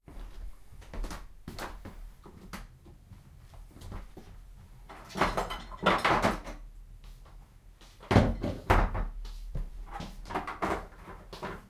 Walking and moving tools and toolboxes on a corridor with a wodden floor.
Recorded with a Zoom H1.